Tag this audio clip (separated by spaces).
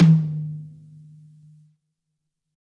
high tom kit drumset set pack